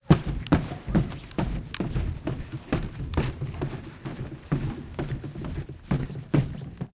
SonicSnap HKBE 09
Jumping on top of a table. Yes, this school is wild :)
Belgium, Ghent, Het-Klaverblad, SonicSnap